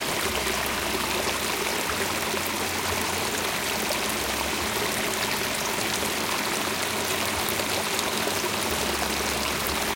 Small waterfall
Grabación de una cascada pequeña en el campo.
nature, field-recording, weather, rain, water